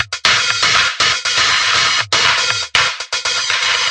20140306 attackloop 120BPM 4 4 Acoustic Kit Distorted loop1k
This is a loop created with the Waldorf Attack VST Drum Synth. The kit used was Acoustic Kit and the loop was created using Cubase 7.5. The following plugins were used to process the signal: AnarchRhythms, StepFilter (2 times used), Guitar Rig 5, Amp Simulater and iZotome Ozone 5. Different variations have different filter settings in the Step Filter. 16 variations are labelled form a till p. Everything is at 120 bpm and measure 4/4. Enjoy!